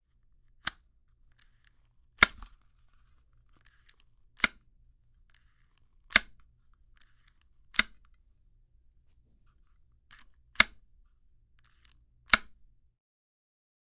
92-Pressing Big Button
Pressing Big Button
Button, Pressing, Switch, Big